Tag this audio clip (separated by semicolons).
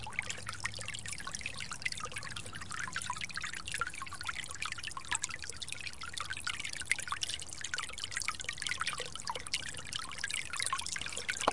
Field-Recording; Stream; Water